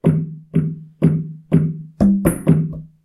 African Djembe Drum - 1
Djembe and other drums.